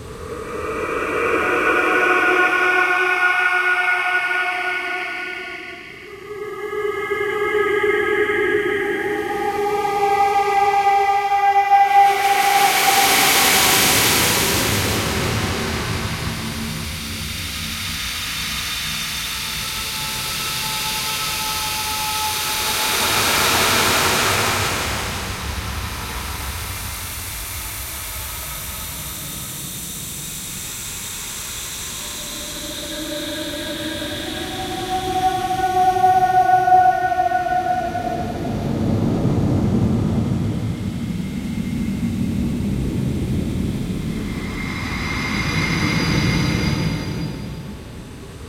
Chaos & Screams
Paulstreteched, loopable and seamless horror ambience of a war veteran experiencing a flashback.
Edited with Audacity.
screams, warfare, military, slow-motion, psychological-damage, horror, hate, violence, slow, horror-story, cinematic, vietnam, soldiers, psycho, fire, gunfire, shouts, fear, veteran, nam, slomo, flashback